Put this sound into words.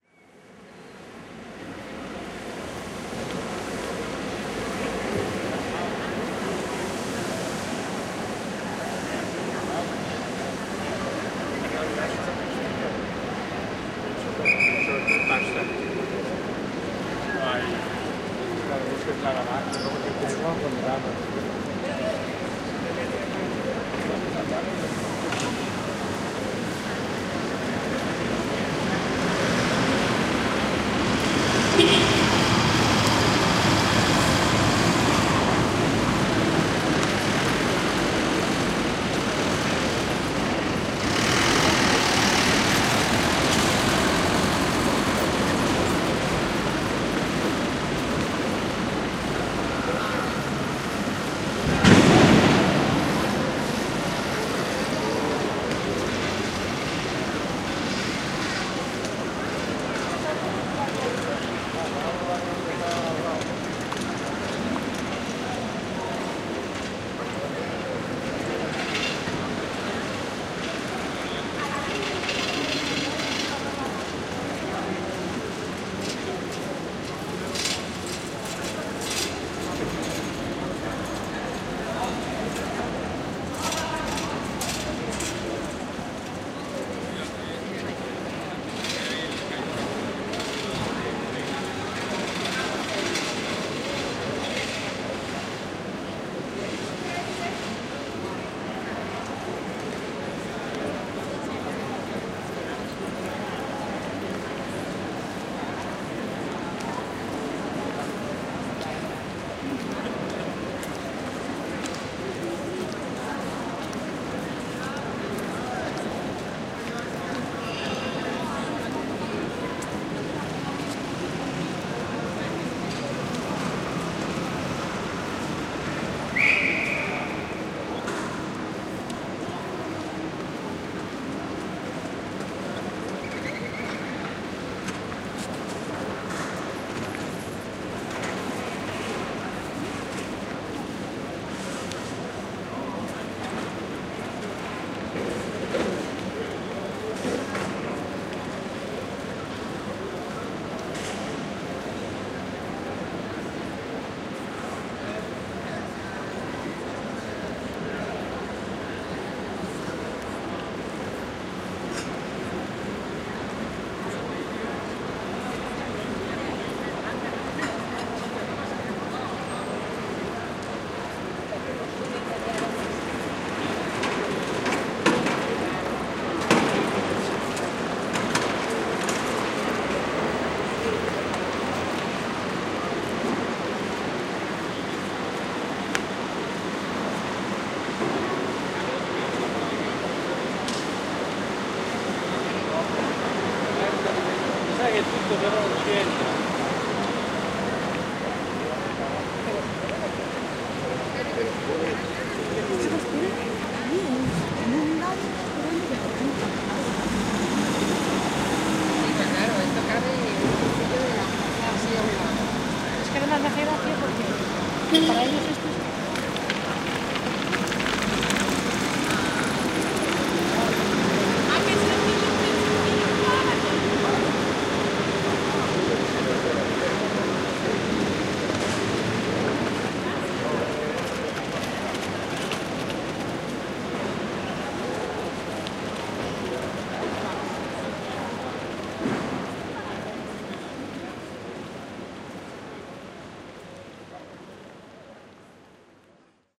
21 01 08-10 30-Plaza san Jaume
Civil power center at the city of Barcelona, Sant Jaume square is filled with a strange mix of mechanical sounds and human sounds. It’s early in the morning. UPS’s are delivering their goods to the stores, getting ready for another hard working day. It’s so hard the work they are doing, that sometimes the authority must put thing in order using its whistles, showing to them that they are not doing as well as they should.
The tourists that had woken up early walk through the square, filling soundscape of human sounds: steps, voices, shoots, comments… lost on the far reverb produced by the stoned walls of the square buildings.
jaume, sant, spain, barcelona, square